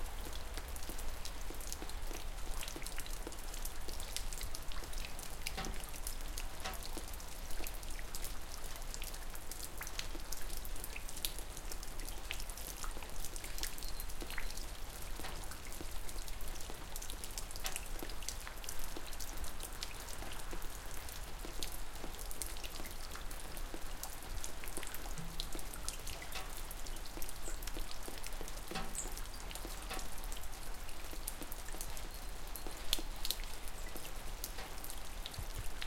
Regn droppande
Sound of dripping rain recorded in stereo.